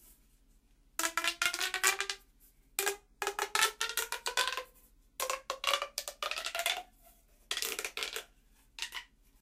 One of those bendy straw tube things
bendable, bendy, hollow, noise-maker, pop, popping, snaps, toy, tube